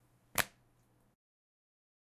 opening deoderant
short audio file opening the lid of a roll on deodorant
opening, owi, deodorant, pop, release, open